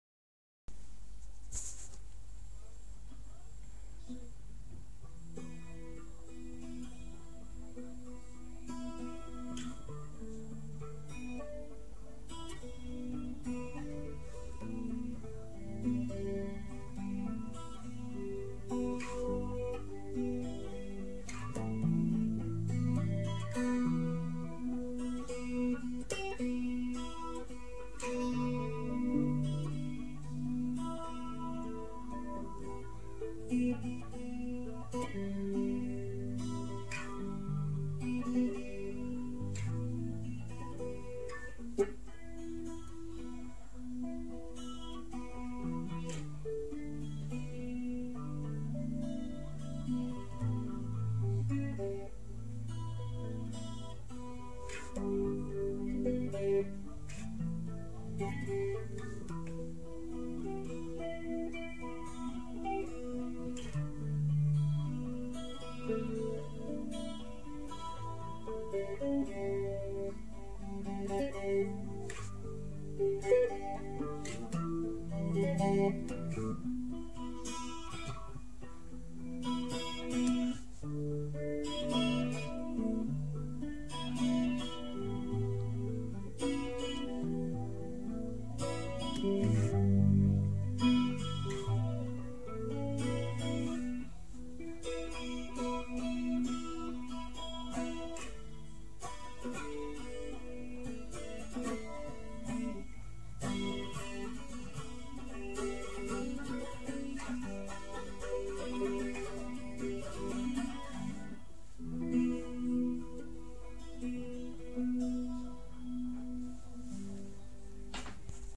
Mystic stringz
Uneek guitar experiments created by Andrew thackray